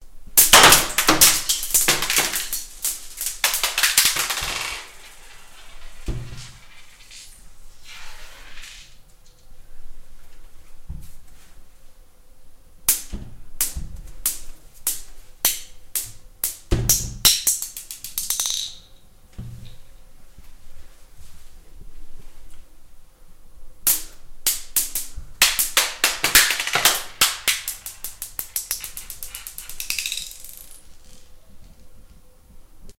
Marbles Bounce
Bouncing of marbles on tile floor
Bounce, Bouncing-marbles, Marbles